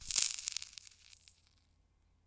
Match Sizzle 02
A lit match being put into a bowl of water. Recorded using a Sennheiser 416 and Sound Devices 552.